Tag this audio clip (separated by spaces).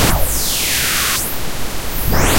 beam-me-up teleport scifi tf2 noise beam-me-up-scotty teleporter